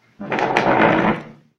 The sound of an animal roaring
animal, roar, strong